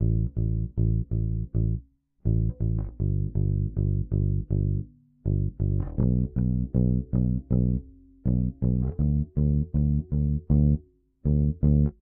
Dark loops 053 bass dry version 2 80 bpm
80, 80bpm, bass, bpm, dark, loop, loops, piano